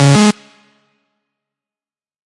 UI Wrong button9
game button ui menu click option select switch interface
menu, select, interface, game, ui, button, option, switch, click